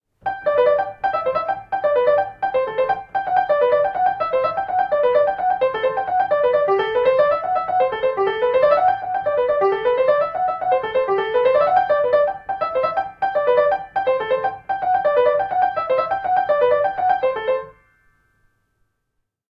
Piano, Bach Fantasia, A (H1)
Raw audio of the opening to J.S.Bach's "Fantasia in G Major" played by myself on a baby grand piano. I recorded this simultaneously with the Zoom H1, Zoom H4n Pro and Zoom H6 (Mid-Side capsule) to compare the quality. The recorders were about a meter away from the piano.
An example of how you might credit is by putting this in the description/credits:
The sound was recorded using a "H1 Zoom recorder" on 17th November 2017.
bach, baroque, fantasia, grand, happy, joyous, piano